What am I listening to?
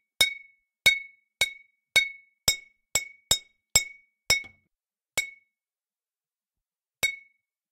Sound of banging to glass bottle.